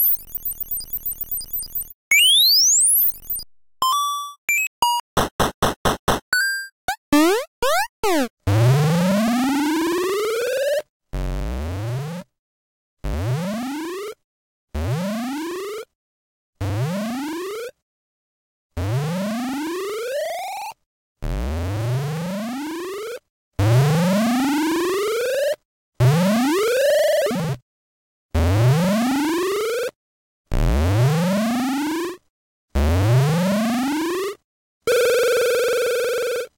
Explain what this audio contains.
classic 8 bit SFX I made for a video game animation. At then end there's a bunch of sweeps as I tried to record the exact sweep I needed.
Coins, jumps, sweeps, sparkles, running
video, jumps, 8, game, running, nes, tones, bit, coins